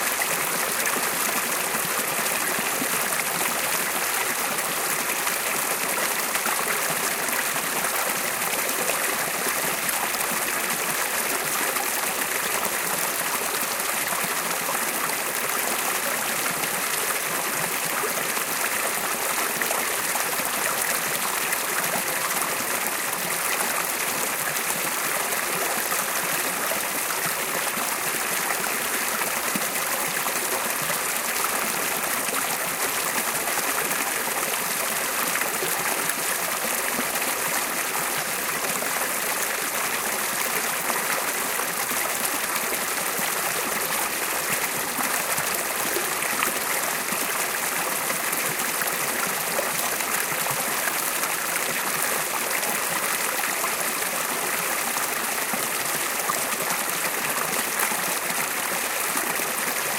Water stream 3
One in a series of smaller water falls from a stream in the woods. Water is pouring down onto some rocks in between two larger rocks.